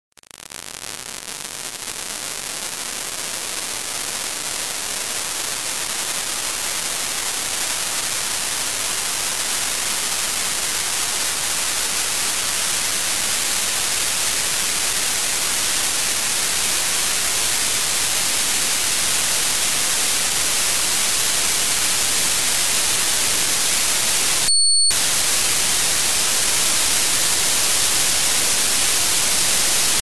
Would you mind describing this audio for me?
39 Dust DensitySweep 0 5000
This kind of noise consists of a certain number of random values per second. This number is the density. In this example there are increasingly more random values per second.The algorithm for this noise was created two years ago by myself in C++, as an immitation of noise generators in SuperCollider 2. The Frequency sweep algorithm didn't actually succeed that well.
density, digital, dust, noise